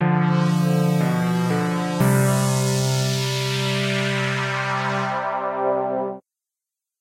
Short, resonant logotone or ident sound. Sounds like something that might appear along with a video bumper before an early 80's PBS program.